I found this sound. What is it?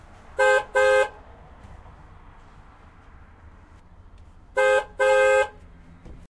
Car horn sound:
Hello friends, you are good to use this sound.
Have time come check out tons more of my sounds.
Feedback would be great.. THANKS!
honk, car-horn, beep, beep-beep, horn, car